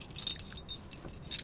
Keys Jangle 01
My Keyring Jangling in a nice way!
jangle, jingle, key, keyring, keys